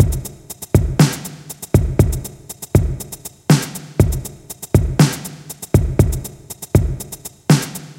Just a drum loop :) (created with flstudio mobile)